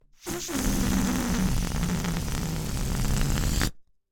Balloon Deflate Short 5
Recorded as part of a collection of sounds created by manipulating a balloon.
Flap, Fart, Short, Deflate, Balloon